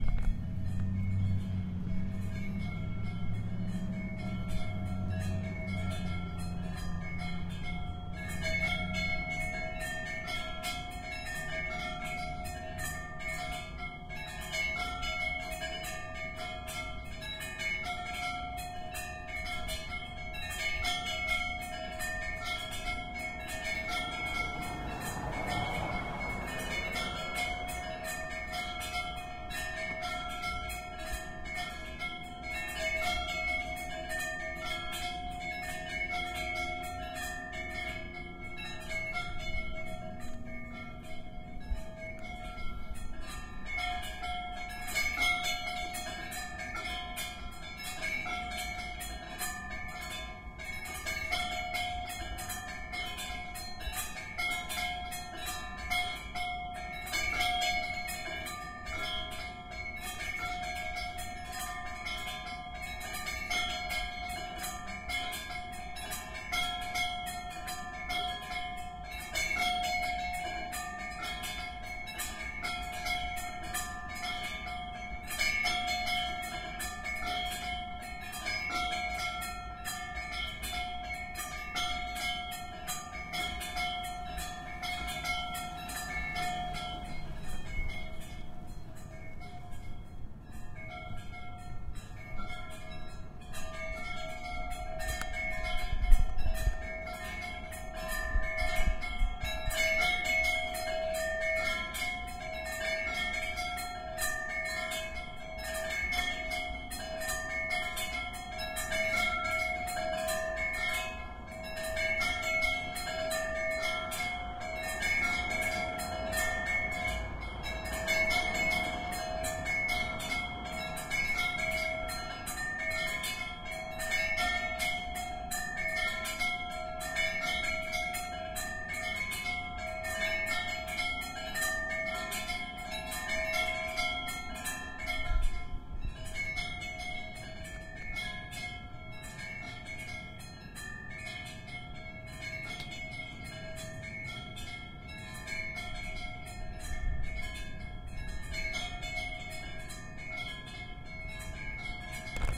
Nautical Wind Chimes in Sault Ste. Marie, Ontario, Canada
Nautical Wind Chime sculpture located on the St. Mary's River boardwalk in Sault Ste. Marie, Ontario, Canada.
You can download a pack of free stock footage taken during the time of this recording here:
amateur, art-installation, bells, boardwalk, canada, cars, chimes, field-recording, high-quality, historical, nature, nautical, noise, river, sculpture, urban, water, wind-noise, zoom, zoom-h4